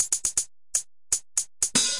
120 Dertill n Amp Drums 02 - hats
bit, crushed, digital, dirty, drums, synth